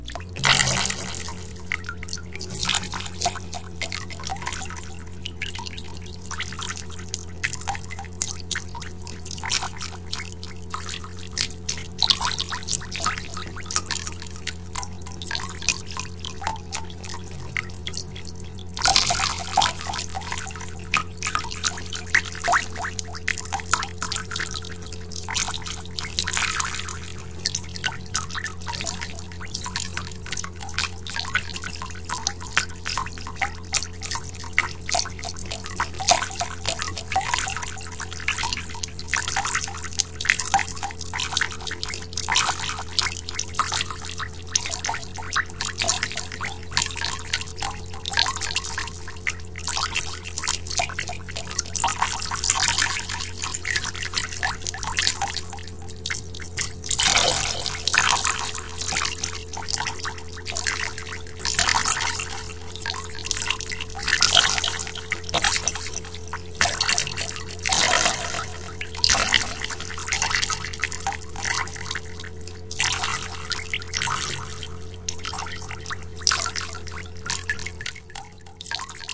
WATER DRIPPING ECHO HIGH PITCH
dripping water hi pitch with echo like in a cave for horror background or ? done on audiocity